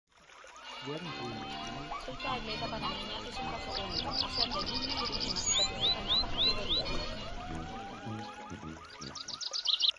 Binaural park sound simulation. The polyphonic sound was created from a set of monaural sounds.